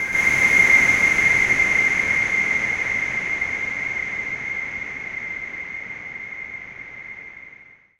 SteamPipe 3 GhostBlow E5
This sample is part of the "SteamPipe Multisample 3 GhostBlow" sample
pack. It is a multisample to import into your favourite samples. A pad
sound resembling the Ghost blow preset in the General Midi instruments
from several manufacturers. In the sample pack there are 16 samples
evenly spread across 5 octaves (C1 till C6). The note in the sample
name (C, E or G#) does not indicate the pitch of the sound but the key
on my keyboard. The sound was created with the SteamPipe V3 ensemble
from the user library of Reaktor. After that normalising and fades were applied within Cubase SX & Wavelab.
industrial, multisample, ambient, pad, blow, reaktor, atmosphere